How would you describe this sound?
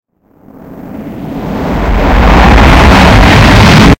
Reverse filler
A sound that can be use to power a scene that zooms in on a cataclysmic event